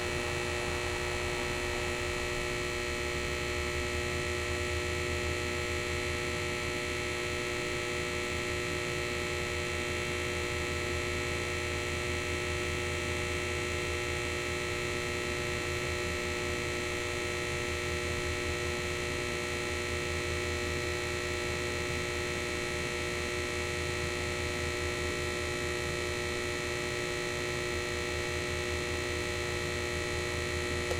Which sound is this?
A recording of a buzzing light at night.
light, night, field-recording, noise, buzzing